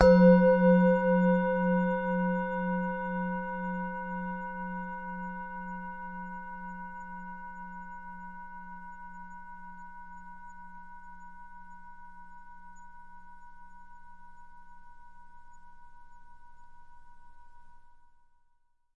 singing bowl
single strike with an soft mallet
Main Frequency's:
182Hz (F#3)
519Hz (C5)
967Hz (B5)